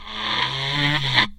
blow.nose.06
daxophone, friction, idiophone, instrument, wood